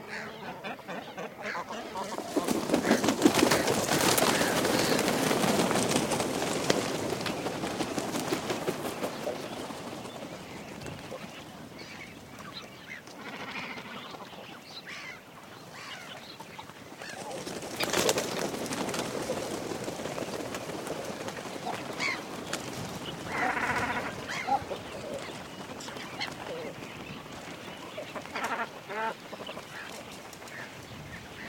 animals cormorants nest take off mono
This ambient sound effect was recorded with high quality sound equipment and comes from a sound library called Cormorants which is pack of 32 audio files with a total length of 119 minutes. It's a library recorded in the colony of the Cormorant birds. Recordings in this library features sound of more than 1000 birds singing at the same time, including recording from nests of the nestlings and seagulls.
ambient, animal, animals, atmo, atomosphere, beast, bird, birds, colony, cormorant, cormorants, design, forest, location, monster, natural, nature, nest, nestlings, sound